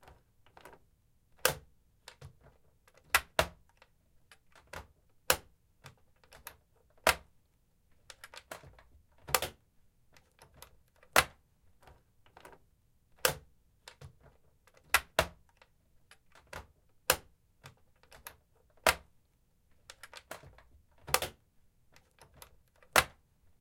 Briefcase Latch close
Stereo Recording of a briefcase latch
box, case, close, Cabinet, Briefcase, Latch